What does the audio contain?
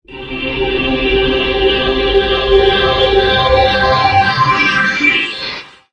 Take Off
Take-Off or launching spacecraft. Mono
Landing 1 in reverse.
aircraft, launch, leave, mono, spacecraft, take-off, takeoff